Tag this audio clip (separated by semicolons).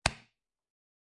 Arm; Body; Crush; Design; Foley; Hand; Heavy; Hit; Punch; Recording; Slap; Smack; Soft; Sound